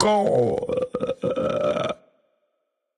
A fake gurgle sound, used in my gifleman cartoon
belly, gurgle, gurgling, stomach